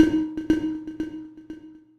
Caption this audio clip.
Pringle 8 - delay
hitting a Pringles Can + FX
loop, metallic, percussion-loop, rhythm, rhythmic